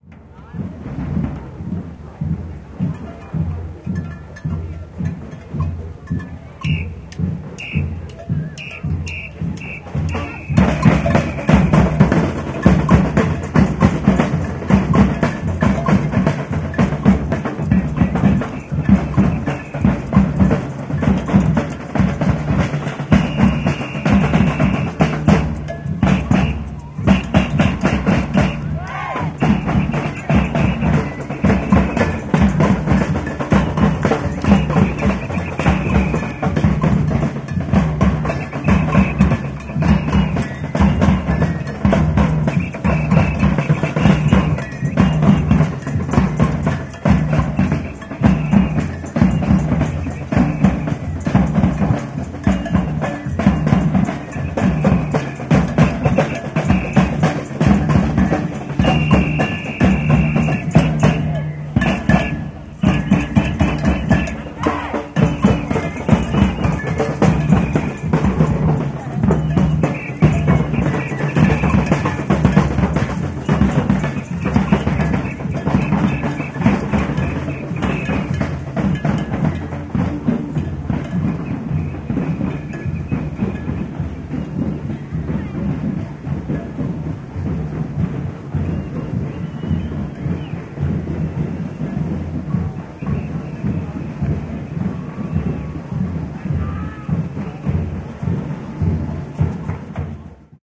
05-Carnival Band
Carnival in Copenhagen 9. juni 1984. Ambience recorded in mono on Nagra IS with a dynamic microphone, 3 3/4 ips. An amateur samba band plays in the street, sounds from audience is heard as well.
ambience, carnival, samba-band